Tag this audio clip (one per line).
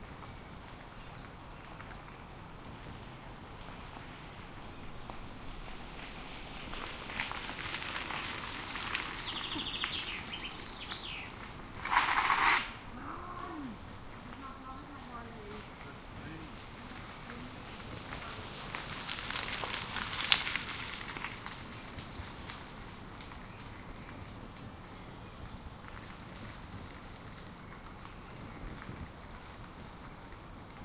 Bicycle
Bike
break
breaking
drag
gravel
walk